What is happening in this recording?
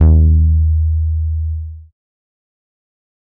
Another Psy Goa trance base sample pack. The fist sample is just a spacer.I think it starts at E1. I have never seen a set of Psy base samples on the net, thought I'd put them up. if anyone has a set of sampled bass for Psy / Goa available, please tell me, I'm still learning, so these are surly not as good quality as they could be! Have fun exploring inner space!
trance, electronic, base, sample, goa, psy, sub